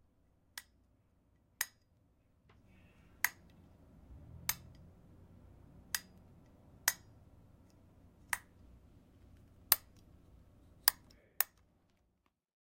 Bedside Lamp Switch On/Off
14 inch Metallic adjustable lamp with turn based switch.